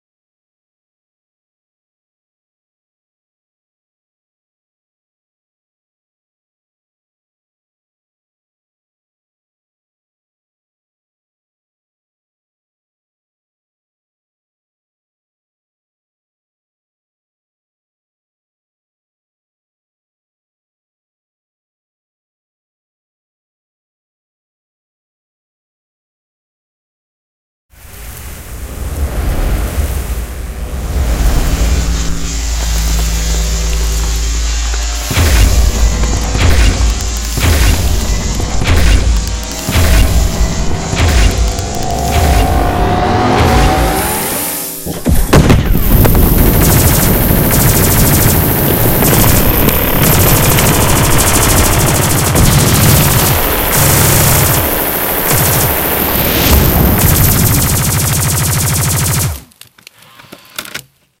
Machine War 2
I’m making soundscapes for fun and just wanna share what i’ve made in my projects. I’m not a professional. Just a dude.
Sounds I used:
80498__ggctuk__exp-obj-large01
77172__defunct3__pulse-laser
130974__duckduckpony__large-low-boom-2
331240__synthy95__plasma-rifle-gun-shots-various
RayGun__newlocknew__robo-gun-sytrus-rsmpl-multiprcsng-serial
TorchWhoosh__kev-durr__fire-torch-whoosh-2-medium-speed
SniperShot__keybal__thermal-sniper-shot-3
Rocks__halleck__dropping-big-heavy-rocks-1
Gravelanche__jorickhoofd__gravelanche-2
345973__doty21__robot-roar-4
178345__erikh2000__stomps-robot-walk
Cassette__harveyjnz__car-cassette-deck-mechanics
Battle, Robots